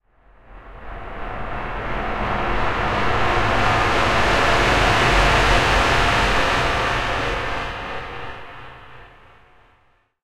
Worrying Transition
The rattle of a nerf gun reloading (own recording, see my other sounds) stretched reverb-added and eq-bass-boosted until it became something sinister.
Recorded with Zoom H2. Edited with Audacity.
cinematic, effect, epic, film, horror, scare, scary, sinister, tension, transient